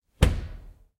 Sound made by an oven door which is shutting. Sound recorded with a ZOOM H4N Pro.
Bruit d’une porte de four se fermant. Son enregistré avec un ZOOM H4N Pro.